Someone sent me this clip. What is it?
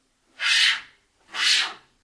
Metal Slide 6a
Metal on Metal sliding movement